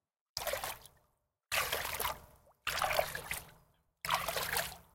Small loop of a "swimming" sound in a lake. Recorded with Zoom H2n near a forest in Germany (see geotag) on March 18th 2015